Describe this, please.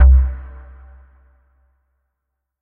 BASS RVB 5
bass, reverb